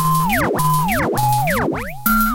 rave tunes 102 bpm-29
rave tunes 102 bpm
delay, electro, rave, trance, 102, techno, dance, tunes, club, dub-step, dub, loop, house, acid, bpm, minimal